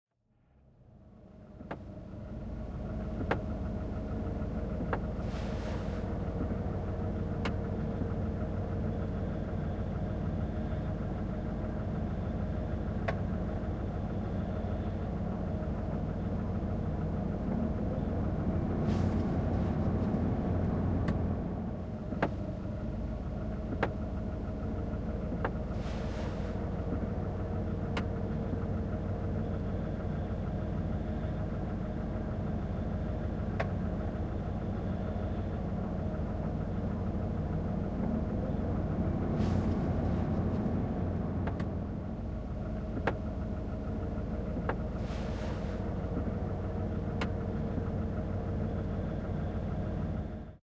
Car Engine interior
Running Car Engine from Interior
running, rumble, field-recording, engine, motor, car, interior, inside